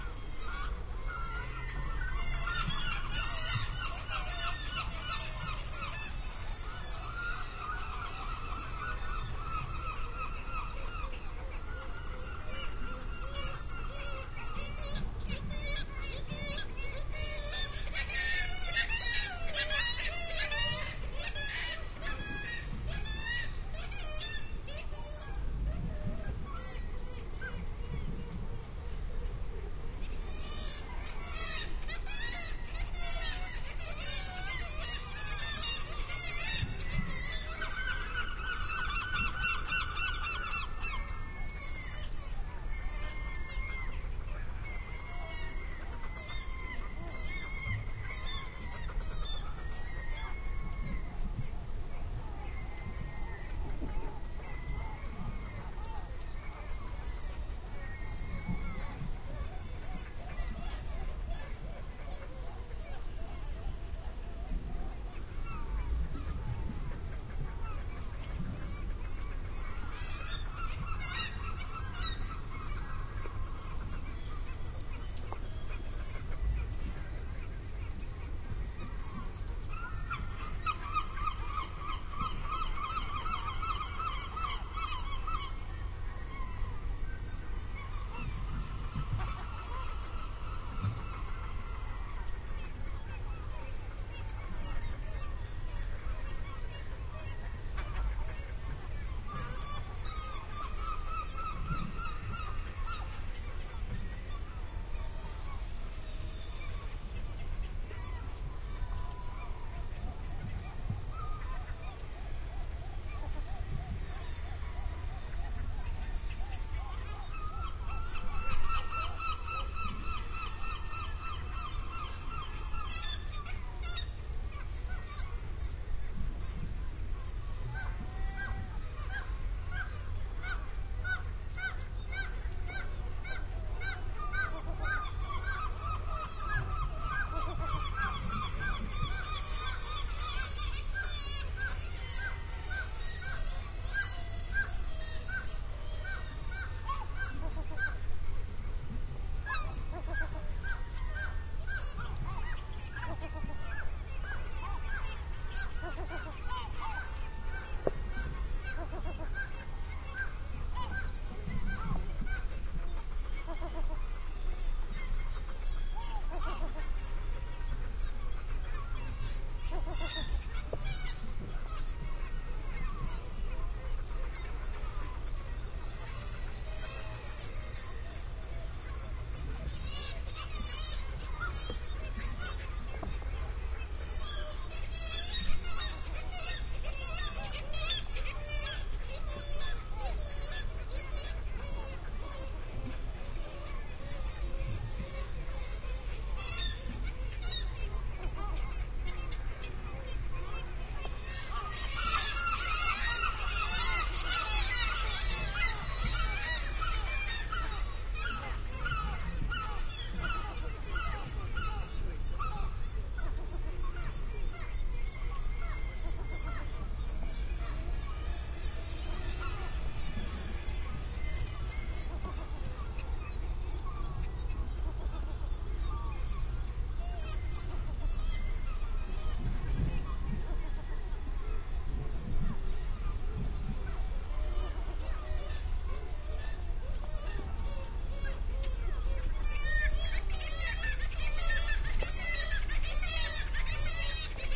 Fowlsheugh Nature clifftop seabird colony
Fowlsheugh is a coastal nature reserve in Kincardineshire, northeast Scotland, known for its seventy metre high cliff formations and habitat supporting prolific seabird nesting colonies, of which you can hear a few. The recording was done in April 2010, using 2 Shure WL183 microphones and a R-09HR recorder.
cliff, flickr, northsea, seagulls, ocean, field-recording, scotland, seabirds